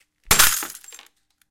Glass Smash
The sound of glass being shattered by a hammer.